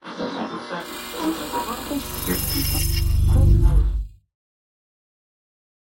radio shudders7x
radio, sound-effect, shudder, grm-tools